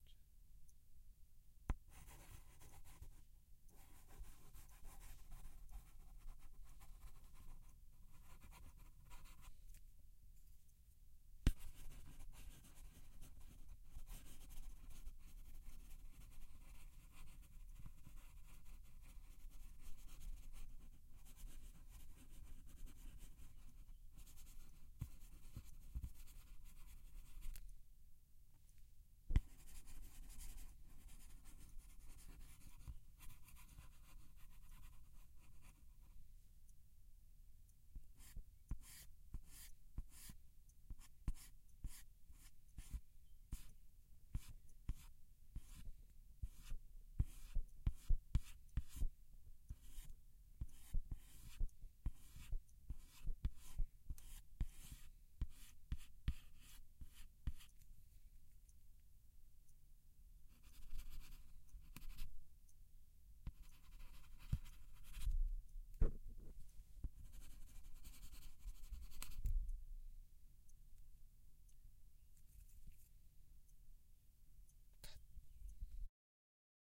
Sketch Sound
The sound of a pen writing on paper.
Pencil, Sketch, Paper, Pen, Write, Draw, Doodle, OWI